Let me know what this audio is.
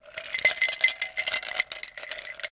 Ice Faling into Glass SFX
Ice cubes falling into a glass.